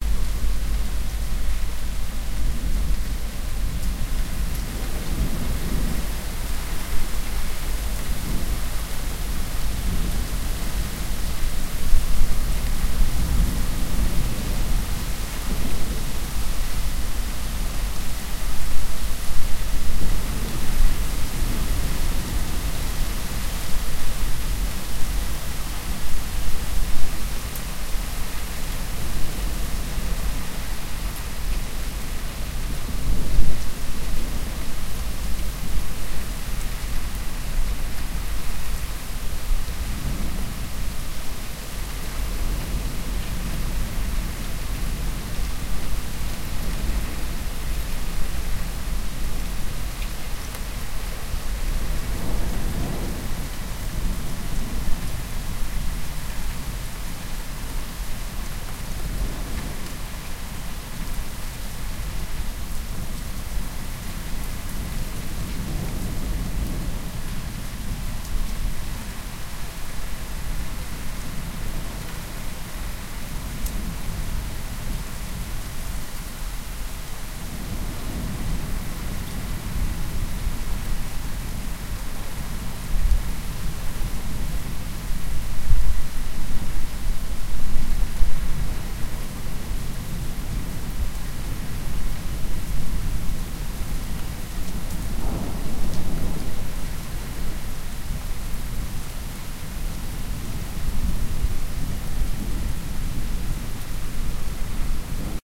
distant rain and thunder
Distant, strong rain with rolling thunder recorded several meters above the ground with a Zoom H1 XY-microphone.
But you don't have to.
Wanna see my works?